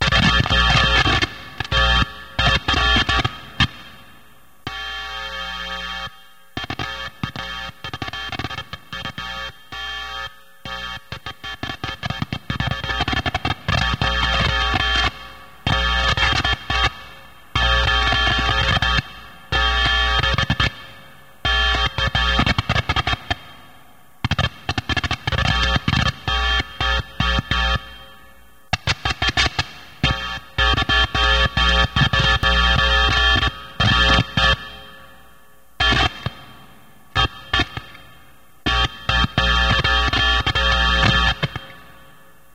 Playing with Guitar Cord
The sound produced from a guitar amplifier by touching the tip of the input cord to various parts of the guitar. This had moderate reverb and chorus effects on.
amplifier, guitar-amplifier